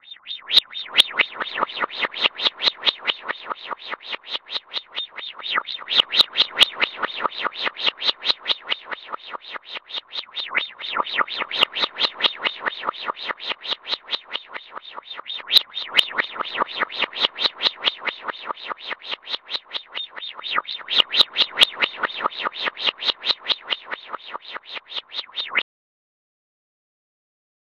JK LAX
Processed sound effect, sounds like an LFO modulating the filter cutoff.
cutoff, filtered, music, percussive